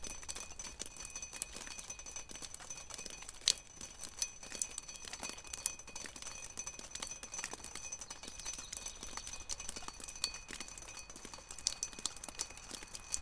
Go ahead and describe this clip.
snow-melt on a shed roof, drips from gutter falling onto old rusting car parts.
recorded at kyrkö mosse, an old car graveyard in the forest, near ryd, sweden
drips, metal, drip